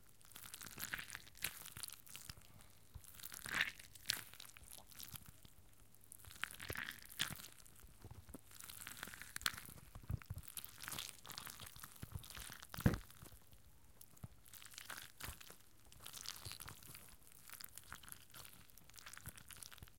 Alien Egg
This sound was made with me manipulating with my hand a little rubber puppet with the form of a shark. Recorded with Zoom H4n built in stereo mic.